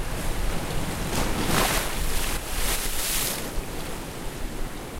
Wave Mallorca 3 IBSP2
16 selections from field recordings of waves captured on Mallorca March 2013.
Recorded with the built-in mics on a zoom h4n.
post processed for ideal results.
recording, nature, athmosphere, waves, mediterranean, field